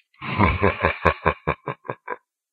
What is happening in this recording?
Somewhat evil laugh :D